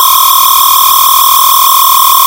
a continuous laser running
continous, elecronic, laser, loop